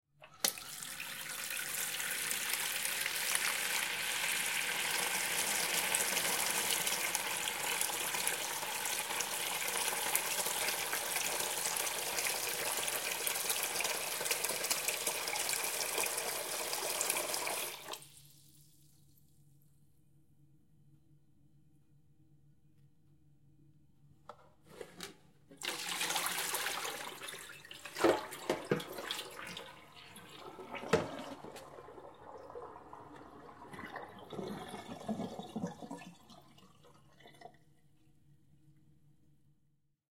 Recorded with a Zoom H4N in a Small House. An Interior Recording of a Sink Bowl being filled and emptied. Stereo Recording